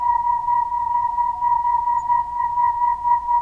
One-shot from Versilian Studios Chamber Orchestra 2: Community Edition sampling project.
Instrument family: Miscellania
Instrument: alien
Room type: Band Rehearsal Space
Microphone: 2x SM-57 spaced pair
alien, fx, miscellania, one-shot, vsco-2